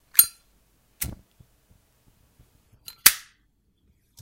Zippo - open, fire, close
Clear sound of zippo lighter opening, fireing nad close.
close, fire, open, zippo